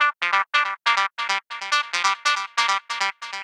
TR LOOP 0415
loop psy psy-trance psytrance trance goatrance goa-trance goa